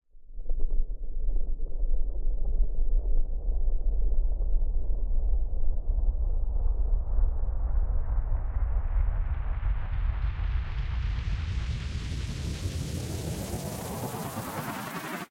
Long Panned Riser v3
Long stereo panned riser (fade in) effect.
abstract, effect, fade, fade-in, future, fx, riser, sci-fi, sfx, sound-design, sounddesign, sound-effect, soundeffect, uplift